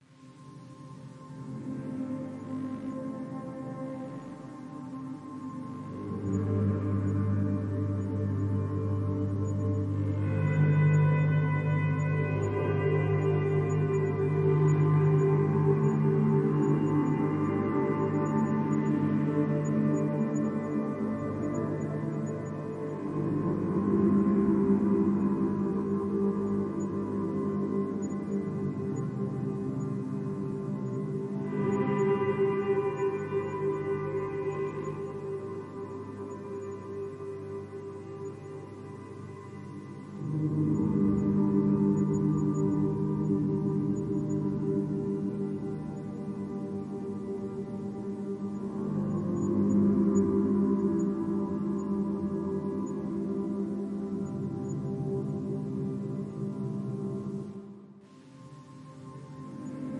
Soundscape created from various samples in audacity, suitable as background or for games.